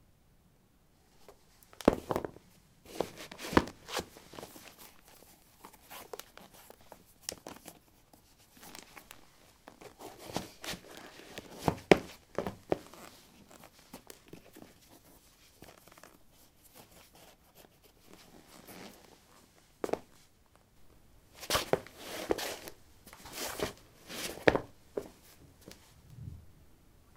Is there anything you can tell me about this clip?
lino 14d lightshoes onoff
Putting light shoes on/off on linoleum. Recorded with a ZOOM H2 in a basement of a house, normalized with Audacity.
steps, footsteps, step, footstep